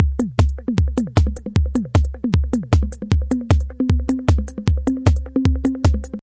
TR-606 (Modified) - Series 1 - Beat 16
Beats recorded from my modified Roland TR-606 analog drummachine
TR-606, Drum, Electronic, Beats, Analog, Circuit-Bend